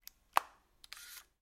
Electronic beep and shutter sounds from videocamera